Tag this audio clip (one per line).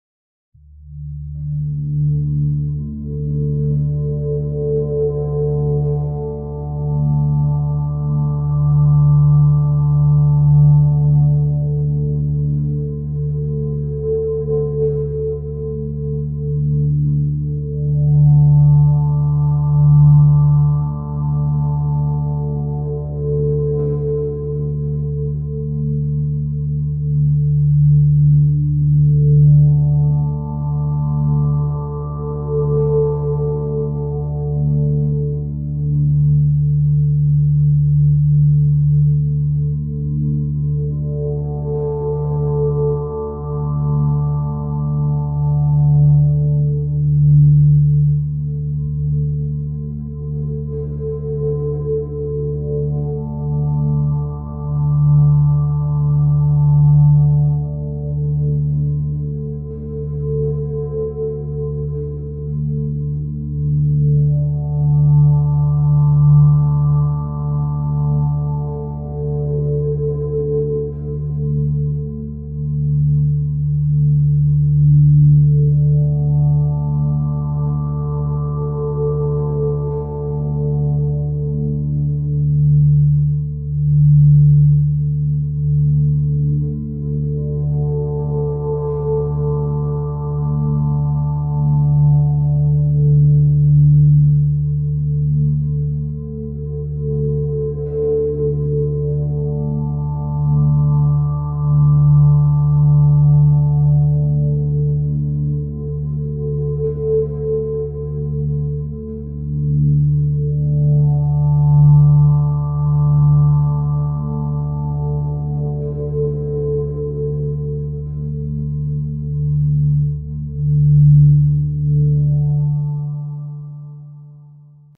ambient
layer
sound
electronic
abstract